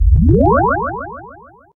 Strange wave
animation, beam, cartoon, film, game, laser, movie, science-fiction, video, warp, wave